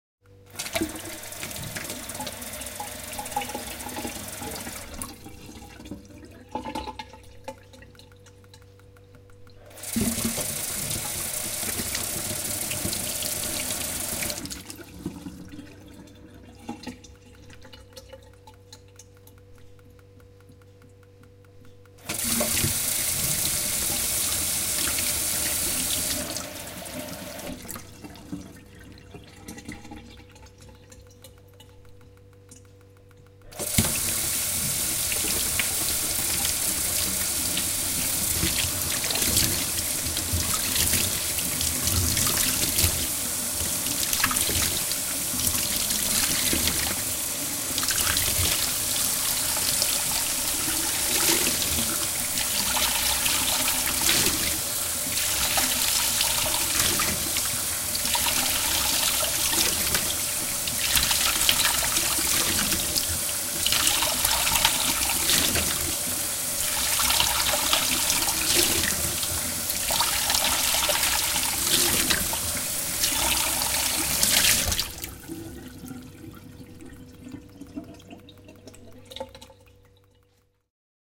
Kitchen sink jam
Jamming with pouring water into the kitchen sink, etc.
kitchen, sink, water